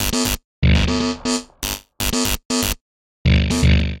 DistortionTones 120bpm04 LoopCache AbstractPercussion
Abstract Percussion Loop made from field recorded found sounds
Abstract, Percussion